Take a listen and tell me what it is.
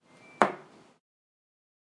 sonido movimiento ficha de ajedrez